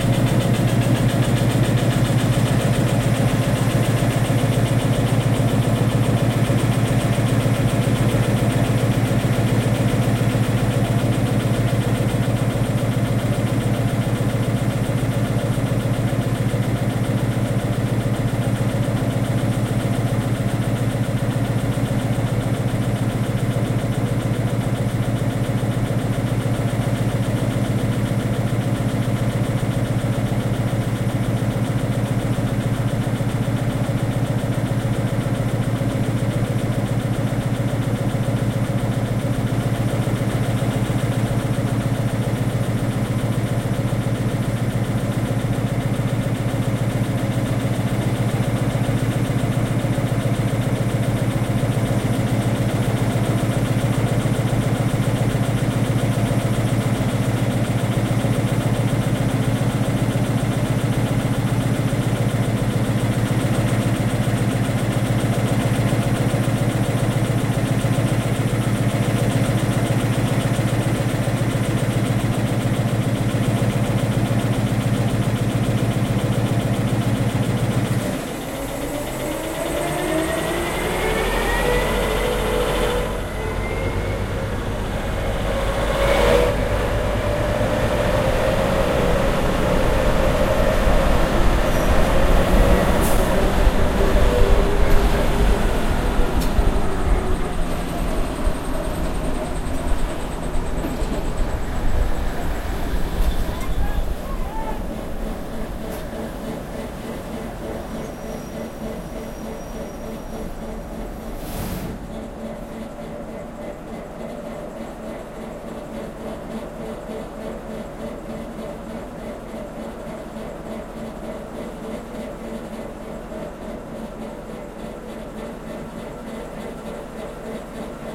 Engine Diesel Train Drive
A Diesel Train Idling and Driving.
Recorded with a Zoom H4N